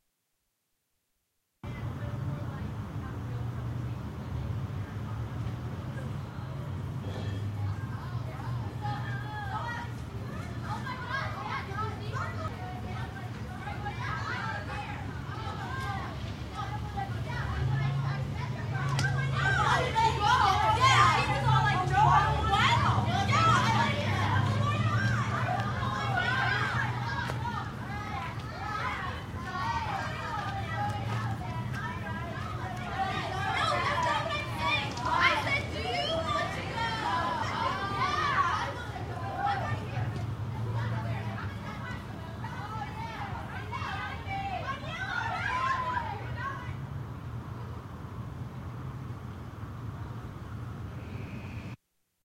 Ambient street sounds followed by noisy women leaving a club.
girls, club, ambient